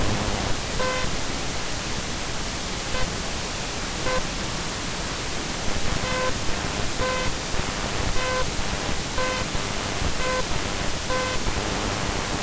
The noisy soundcard on my laptop at it's worst.